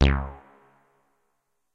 MOOG BASS SPACE ECHO C#
moog minitaur bass roland space echo
bass, echo, minitaur, moog, roland